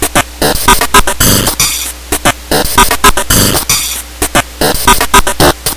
Yea A Roland 505 ......
Good Intro Beats or Pitch Them Down.... Whatever....